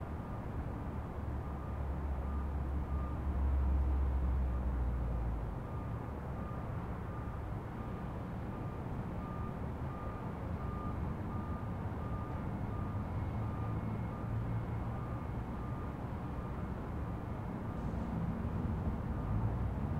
OUTSIDE CONSTRUCTION AMBIENCE FAR 01
Some outside ambience with construction off in the distance recorded with a Tascam DR-40
ambience, outside, roomtone